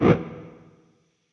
This sample was created taking as a starting point a synth perc sound from a VST percussion synth. This creates a lot of high frequency contents which was not present in the original sample.This sound is intended to be part of an electronic or glitch "percussion" set.